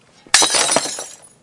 Breaking Glass 11
Includes some slight background noise of wind. Recorded with a black Sony IC voice recorder.
pottery, breaking, glasses, break, crash, smash, glass, shards, splintering, crack, shatter